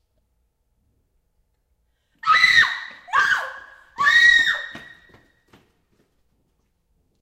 girl scream frank 7
screaming, screams, scream, girl, scary, woman, horror